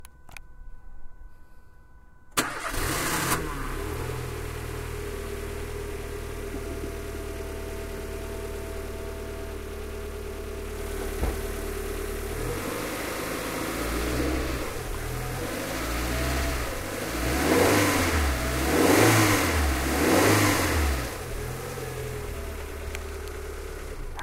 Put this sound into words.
SonicSnaps CCSP car
Field recordings captured by students from 6th grade of Can Cladellas school during their daily life.